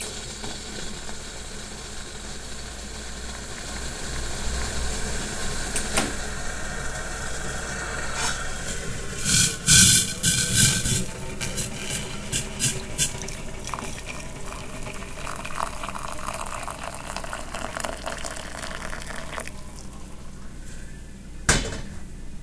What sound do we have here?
kettle and hot water
poring, Kitchen, boil, boiling-water, boiling